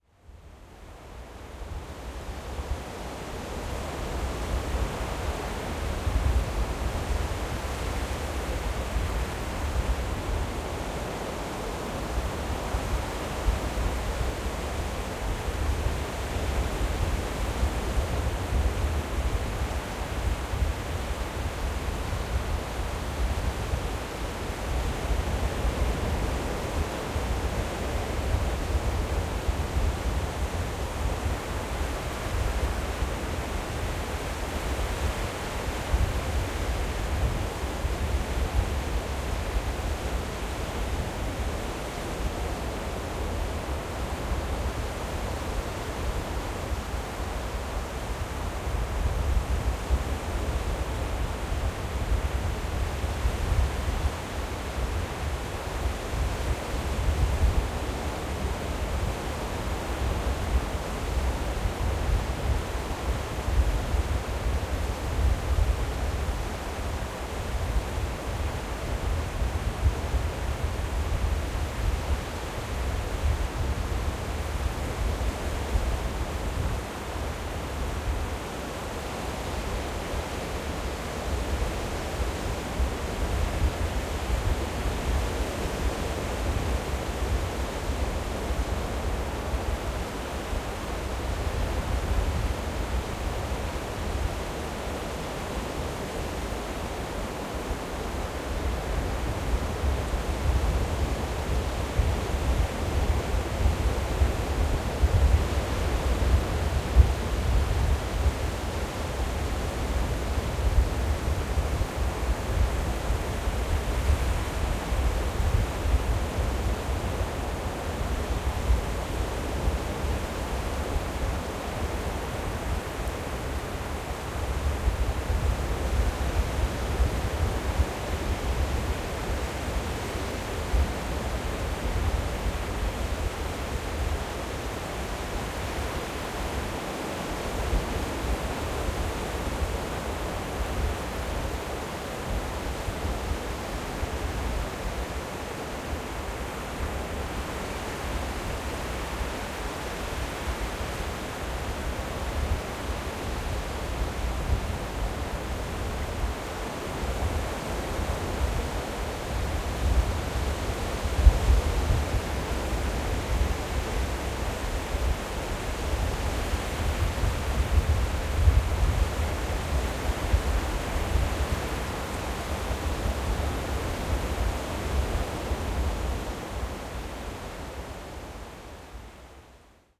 4th Aug 2022 - New Brighton beach on a Windy Day at Flood Tide
The sound of waves on a flat beach during flood tide on a windy day.
Recorded at New Brighton beach in the Mersey Estuary on the Wirral peninsular.
Long waves can be heard sweeping across flat sand towards my position with distant larger waves breaking on a sandbank further out. The tide is coming in, and by the end of the recording the water is a few inches deep around me. There was a strong breeze whipping up the waves in the estuary, but the zoom dead cat seems to do a good job of minimising the wind noise.
Recording Date - 2022/08/04 - @12:50
recording equipment - Zoom H6 (40% gain)>XYH6 mic (120°) + Zoom dead cat wind screen
Weather - sunny 16°C - North-Westerly wind (strong breeze)
Post processing
Low cut - 72 Hz (12 db)
High Shelf - 1800 hz - 3 db
Normalised to -3 dbfs
Fade in/out (3 secs)
Beach, Estuary, Flood, H6, Liverpool, Mersey, Ocean, Sea, Tide, Water, Waves, Wind, Windy, XY